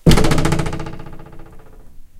A cartoon "boing!" sound I made yesterday, recorded with the Zoom H4N. Although this sounds like it was done with a ruler, as much "boing" sounds are, but this was actually done by me kicking my bedroom door!